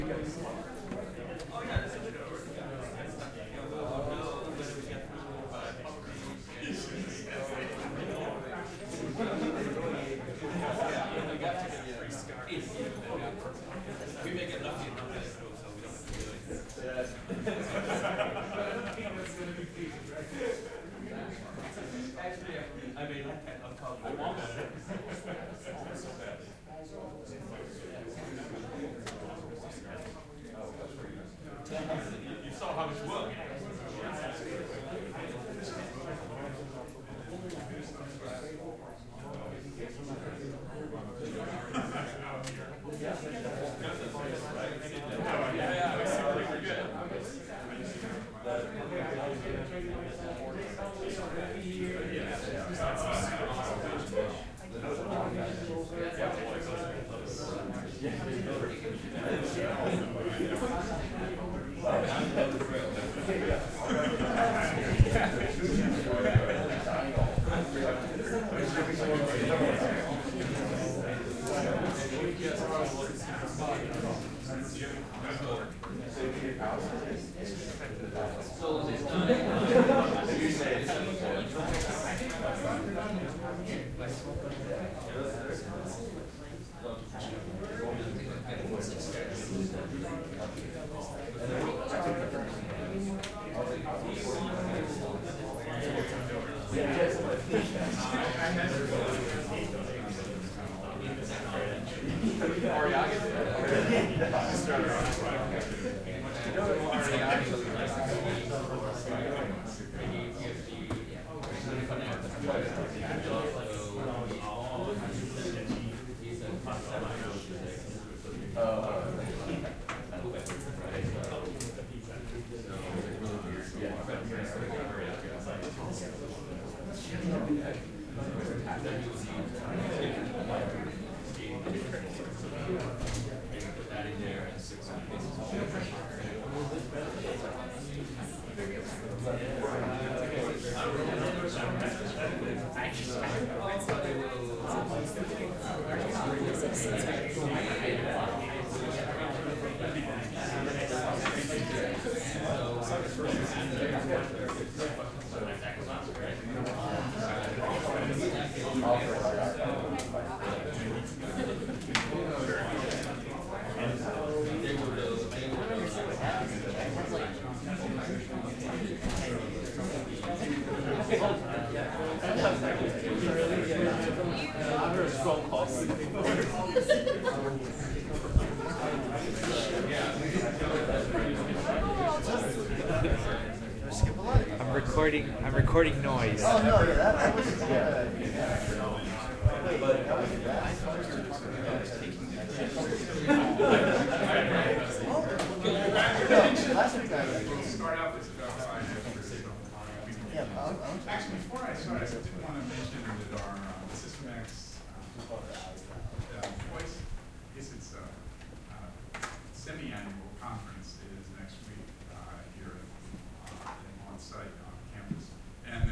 crowded-lecturehall
Lecture hall before a class. Recorded with a RØDE i-XY